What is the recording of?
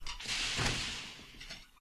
A fly screen door.